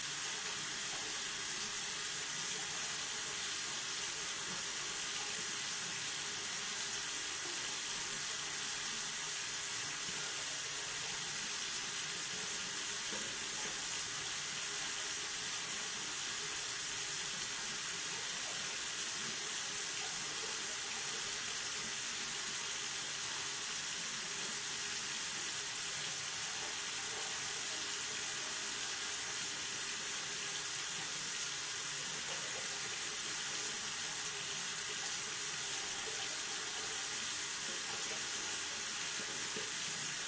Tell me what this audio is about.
A water faucet recorded in the bathroom.